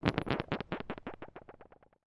Verre Sur Béton Rebonds 2
ambient, misc, noise